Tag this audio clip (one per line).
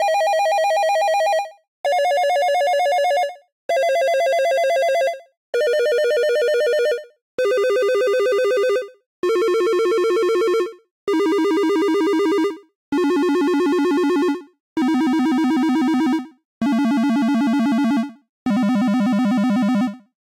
phone
game
movie
synth
telephone
ringing